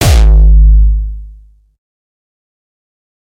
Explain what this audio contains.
hardstyle kick 1
A hard hardstyle kick
hardstyle, punch, nu-style, kick, tok